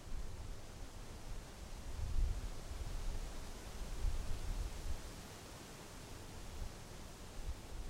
wind in trees
wind, trees